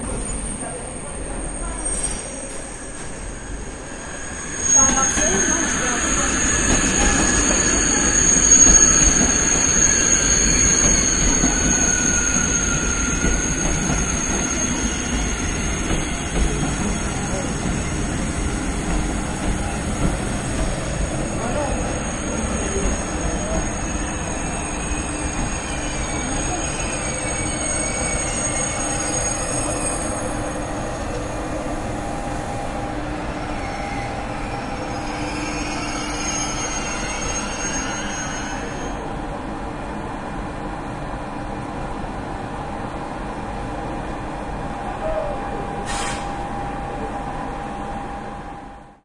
Part of the Dallas Toulon SoundScape exchange.
Train arriving at the station. Announcements. Voices.
toulon, train, soundscapes